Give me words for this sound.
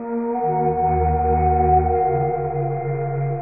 070 Cirque de la vie
I came across some old recordings that I made of an old and rather huge barrel-organ at a fair. I did some processing and adjustments and this beautifully sad sound turned up. I used it in a piece called "Sometimes Towards Eden" at the very beginning.
070-bpm, barrel-organ, melancholic, melodic, mono, parish-fair, processed, sad, sometimes-towards-eden